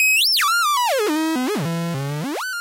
sample of a REAL syn drum-unedited-recorded direct thru Balari tube pre into MOTU/Digi Performer setup.